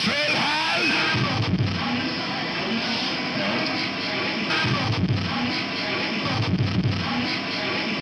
voice FELL HALL!
I scream "Fell Häll!" and put it a distorsion
scream, distortion